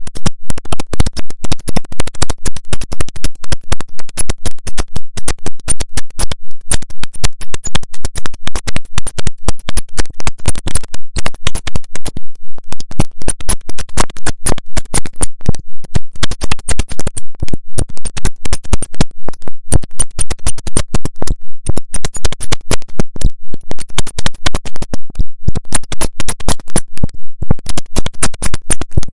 There's been a breach in the hackframe. Prepare to launch diagnostic security mi55iles.
sci-fi, sfx, strange, diagnostics, glitch, sound-effect, sound-design, future, telemetry, electric, hack, machine, robobrain, electronic, crunchy, soundeffect, generate, noise, glitchmachine, robotic, distorted, abstract, click, sounddesign, fold, interface, buzz, breach, digital, droid